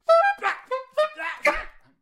Playing and yelling on the alto sax.